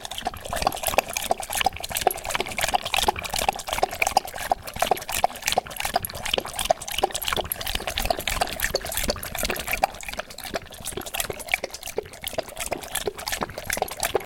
A dog is drinking water out of his bowl.